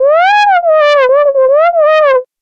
sonokids-omni 26
moog, comedy, synth, electro, game, ridicule, filter, bleep, happy-new-ears, cartoon, synthesizer, abstract, sound-effect, fx, strange, weird, toy, analogue, beep, sonokids-omni, electronic, soundesign, funny, analog, fun, speech, lol